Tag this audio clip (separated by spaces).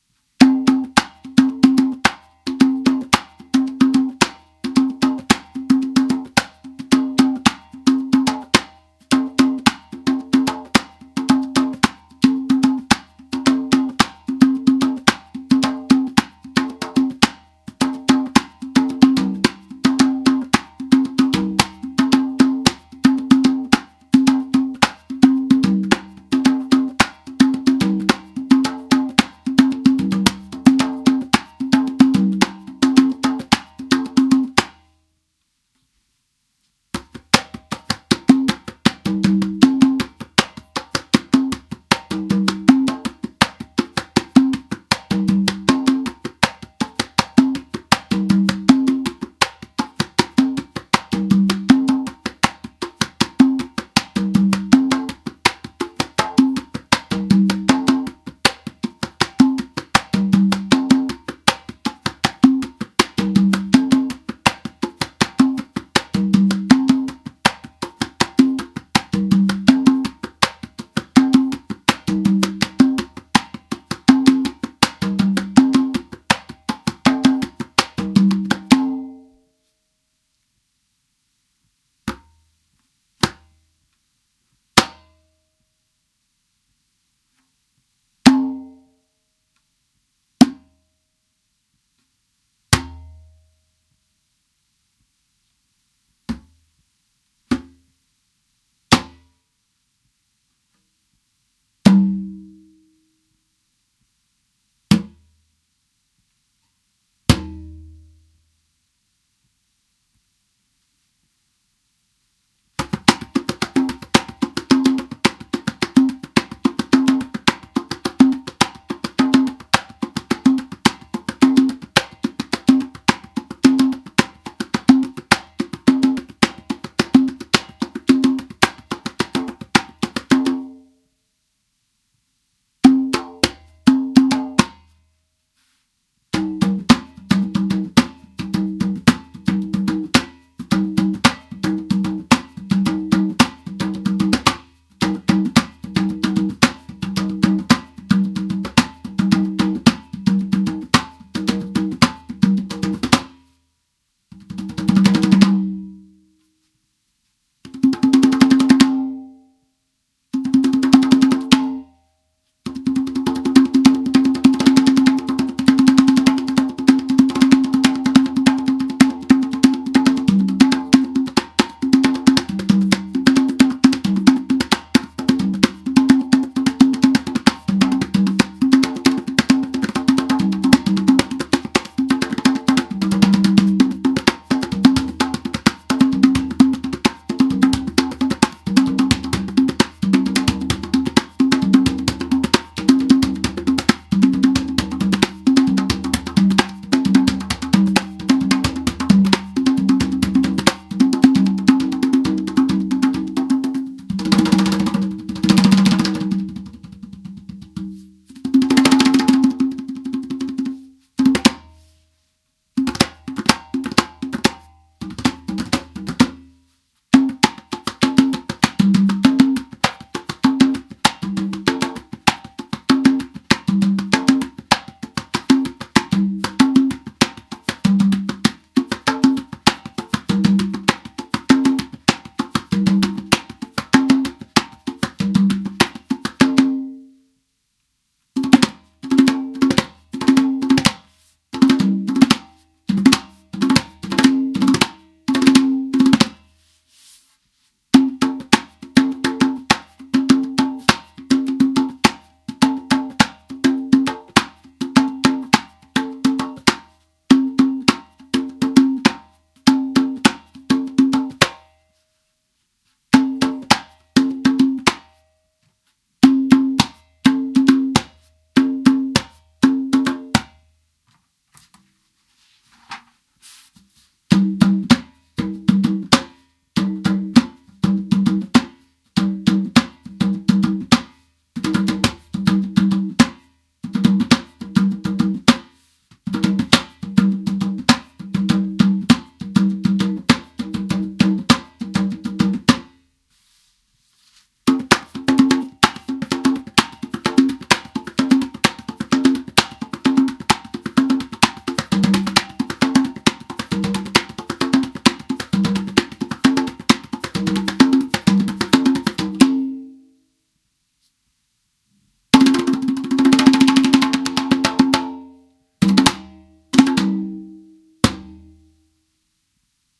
tumbao salsa percussion congas latin beat quinto hand conga drum